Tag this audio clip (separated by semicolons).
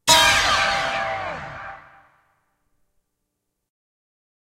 Metal; Korg; NX5R; Stab